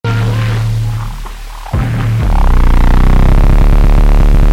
res out 07
In the pack increasing sequence number corresponds to increasing overall feedback gain.
feedback-system, chaos, computer-generated, synth, neural-oscillator